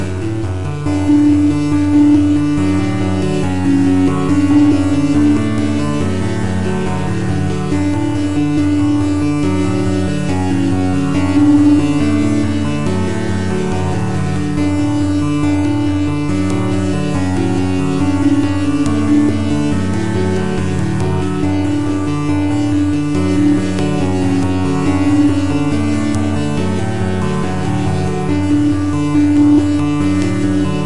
Park Of Joy
second version of a moody pad